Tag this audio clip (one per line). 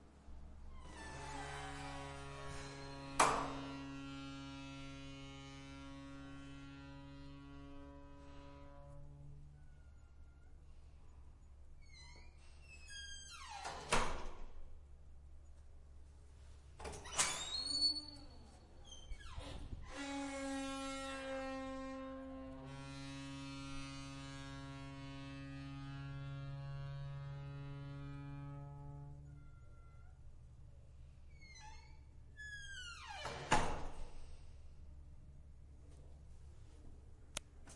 close
closing
creak
door
field-recording
squeak
squeaky